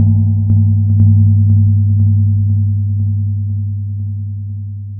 carla-de-sanctis-Drum delay
Risset Drum. Delay and echo effect each second.
delay, drum, sound